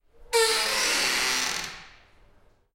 Door Squeak, Loud, A
Raw audio of a terrifyingly loud door squeak from a public toilet door.
An example of how you might credit is by putting this in the description/credits:
The sound was recorded using a "H1 Zoom recorder" on 16th February 2016.
Door, Loud